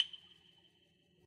This comes from a drum synth function on an old mysterious electric organ. It also features the analog reverb enabled.
analog, cabinet, drums, reverb, speaker, synth